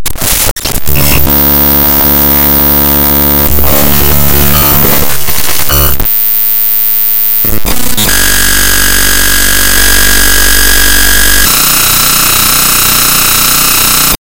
created by importing raw data into sony sound forge and then re-exporting as an audio file.
clicks, data, glitches, harsh, raw